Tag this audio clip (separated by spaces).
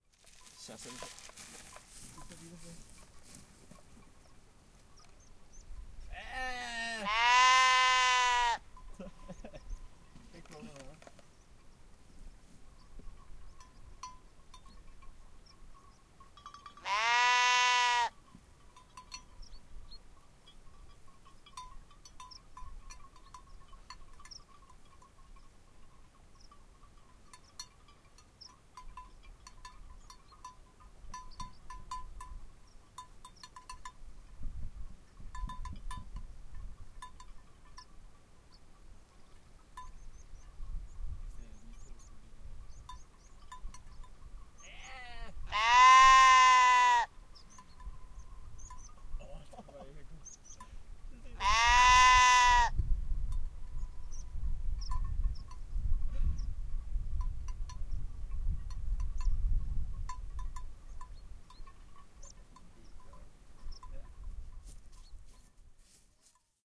fjell mountains